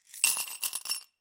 COINS IN A GLASS 17
Icelandic kronas being dropped into a glass
dime, currency, change, coins, money